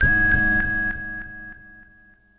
Q harsh bleep plus click delay at 100 bpm variation 4 - G#4
This is a harsh bleep/synth sound with an added click with a delay on it at 100 bpm. The sound is on the key in the name of the file. It is part of the "Q multi 001: harsh bleep plus click delay at 100 bpm" sample pack which contains in total for variations with each 16 keys sampled of this sound. The variations were created using various filter en envelope settings on my Waldorf Q Rack. If you can crossfade samples in you favourite sampler, then these variations can be used for several velocity layers. Only normalization was applied after recording.
100bpm, electronic, multi-sample, synth, waldorf